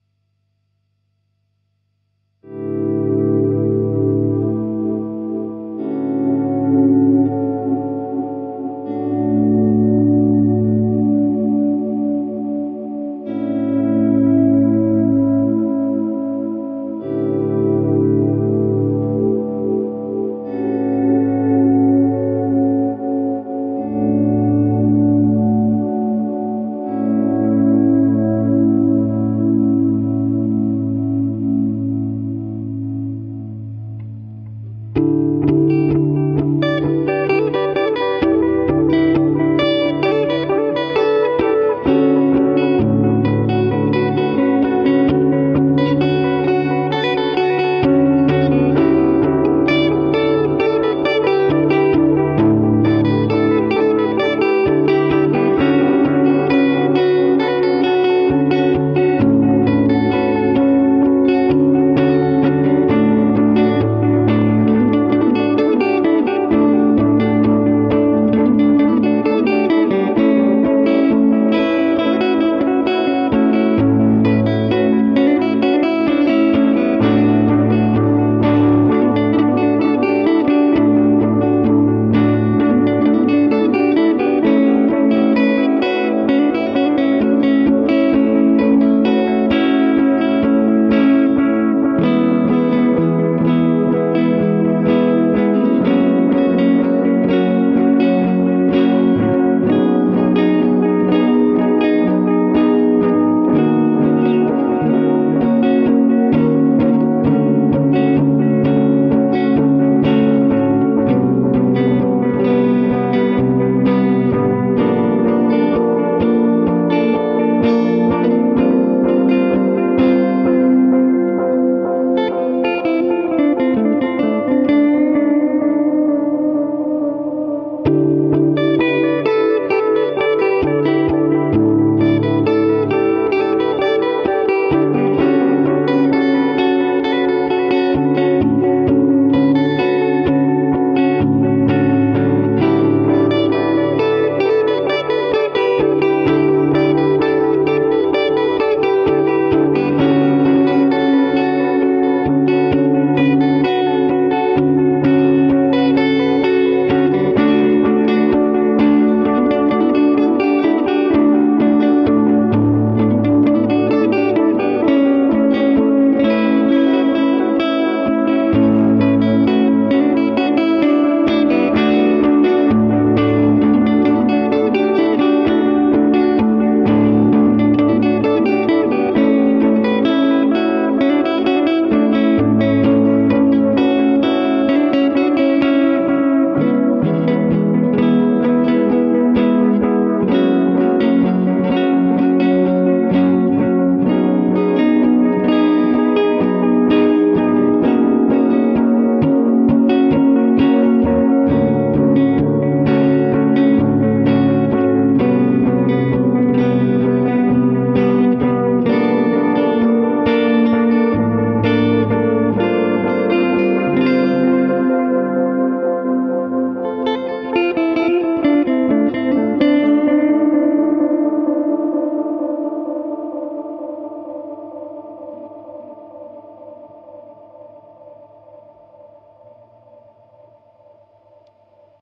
This is qiuet, ambience electroguitar melody, where i used open-chords in clean tone whith using delay (Electro-Harmonix memory toy) and reverberation (Electro-Harmonix holy grail plus) guitar pedals. Record in Cubase, through "presonus inspire 1394". Melodic.
Ambience, ambient, Chords, delay, echo, effect, electric-guitar, experimental, guitar, melodic, music, qiuet, song, sound